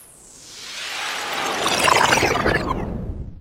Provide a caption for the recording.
And then some other effects applied.